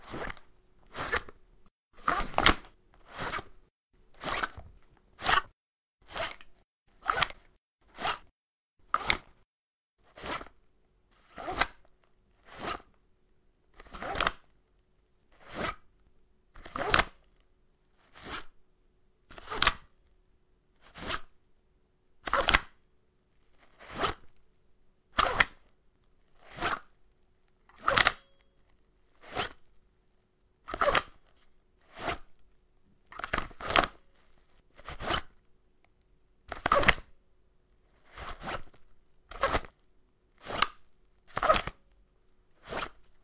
Drawing and holstering an airsoft pistol from a semi-soft thigh holster with various straps and velcro.